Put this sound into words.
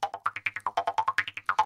Jaw harp sound
Recorded using an SM58, Tascam US-1641 and Logic Pro